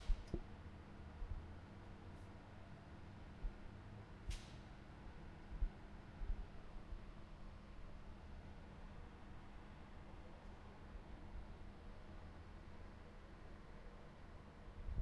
Night city reverb
city, night, reverb, rooftop